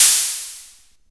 MATTEL HHO2
Open Hi-Hat from sampled analog drum computer. Mattel Synsonic Drum Pad.